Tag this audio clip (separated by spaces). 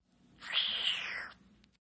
NOW FLY AWAY AGAIN